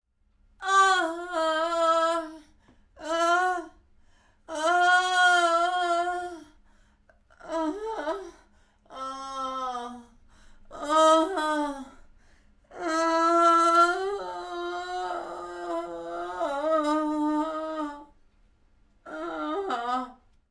Young woman moaning. Could be pain or erotic. Recorded for my horror podcast. Mic - Rode NT1-A
erotic, female, groan, ill, moan, moaning, pain, painful, sick, voice, Woman